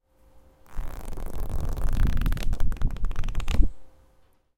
mySound GPSUK Book
Flicking through a book